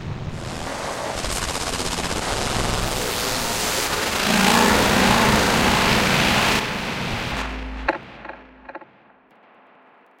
noisy drone sounds based on fieldrecordings, nice to layer with deep basses for dubstep sounds